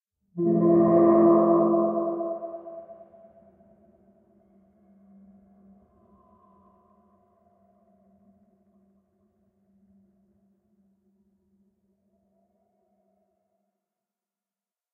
Death Horn
Processed sound effect created with a boat ferry horn field recording captured at Fort Jackson in Savannah, Georgia in the spring of 2019, for Savannah College of Art & Design
ferry, ship, horn